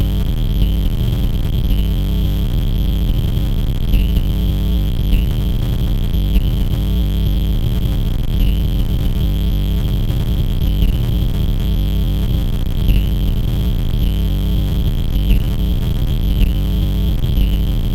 noise background 01
electronics, warm, circuitry